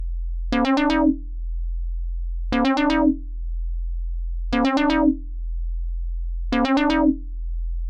Some recordings using my modular synth (with Mungo W0 in the core)
Analog, Modular, Mungo, Synth, W0